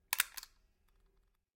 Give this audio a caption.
Stapler Hands 03
Collection of sounds from a stapler. Some could be used as gun handling sounds. Recorded by a MXL V67 through a MOTU 828 mkII to Reaper.
click, hit, staple, thud